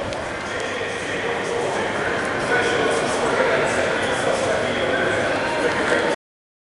Shopping Mall noise 6
Noise recorded at Manufaktura- Shopping Mall in Łódź, Poland
It's not reminded by any law, but please, make me that satisfaction ;)
center, city, mall, noise, people, poland, shopping